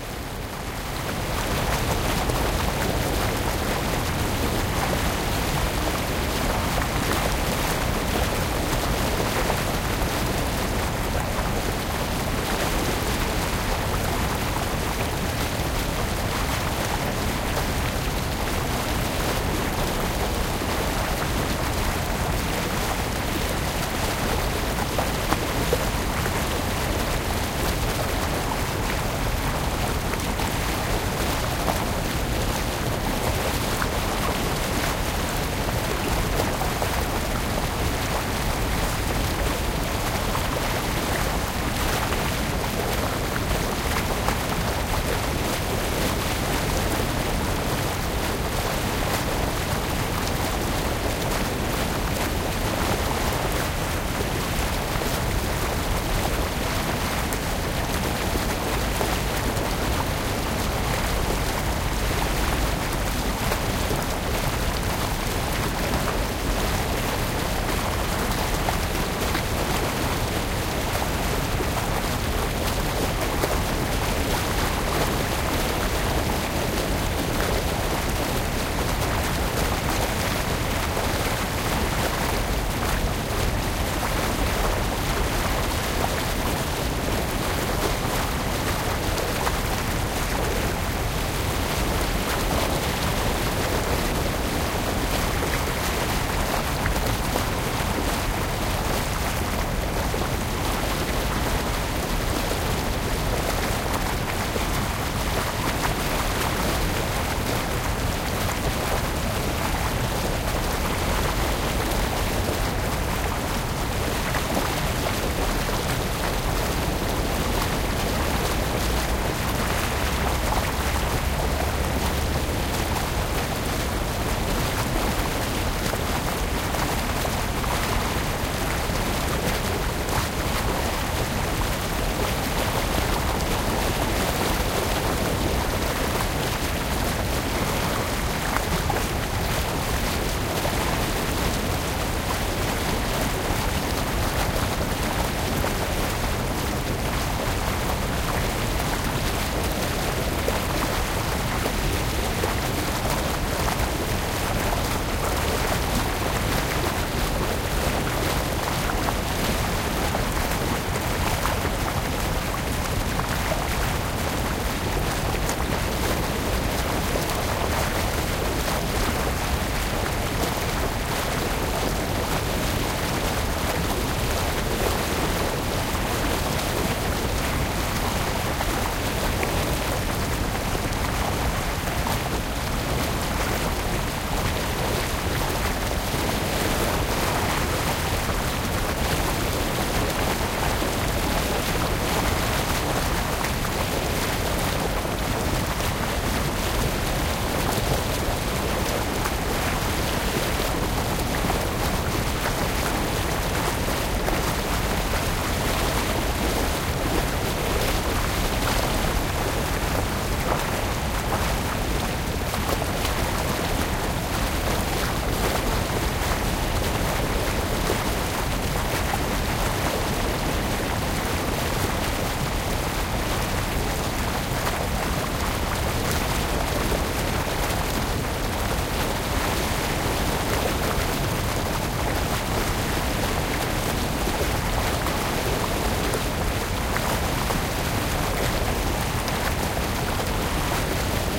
Short recording of a weir in Hannover. The river Leine was pretty full, when I did this recording near the Leineinsel in the south of the town in February 2008. OKM II microphones with the A3 adapter and the iRiver ihp-120. The microphones were placed on a wall, because of the wind.
The amazing thing is this geotagging thing! I made a mark right on the spot.
stream, water, river, weir, splash, flickr, field-recording